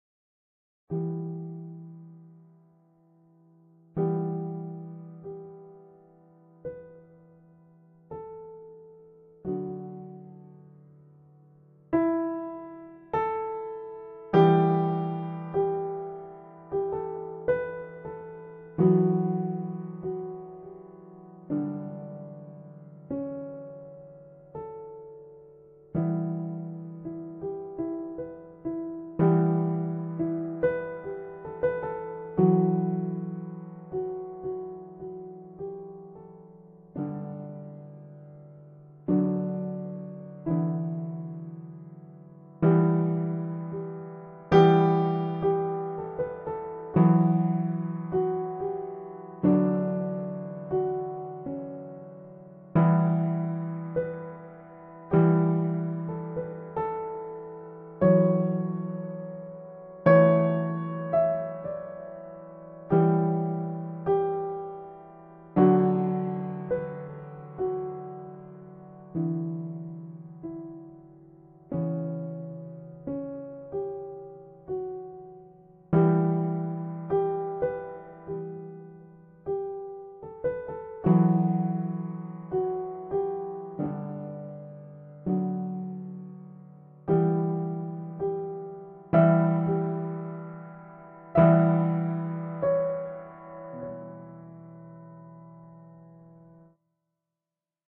Ambient somber piano music.
Made using
• M-Audio Oxygen 61
• FL Studio
• Independence VST
I'm fine if you use this in a for-profit project, as long as you credit.